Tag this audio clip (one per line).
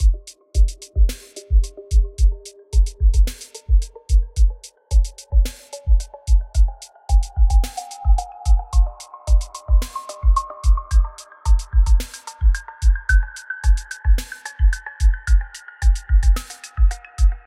drum
funk
hip
hop
hypnotic
kick
liquid
loop